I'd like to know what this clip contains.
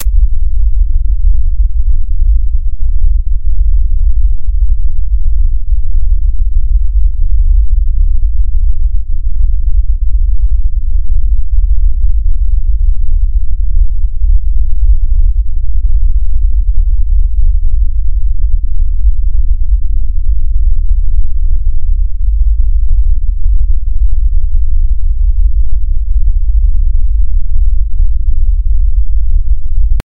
This kind of noise generates sinusoidally interpolated random values at a certain frequency. In this example the frequency is 100Hz.The algorithm for this noise was created two years ago by myself in C++, as an imitation of noise generators in SuperCollider 2.